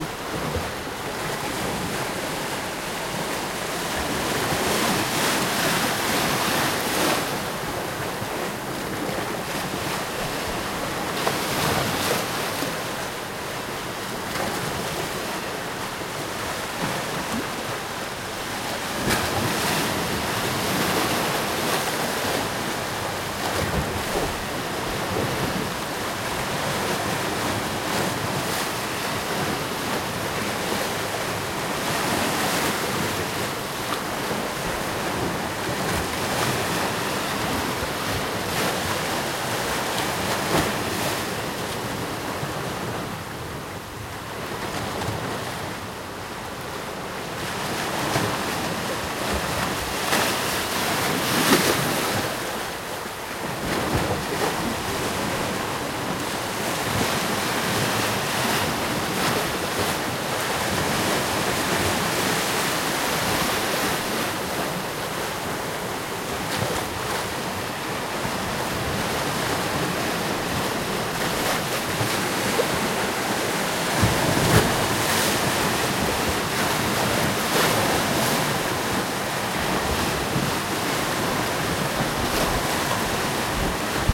beach sea ocean waves wooshing by in stereo 2
sea, waves, ocean, wooshing, beach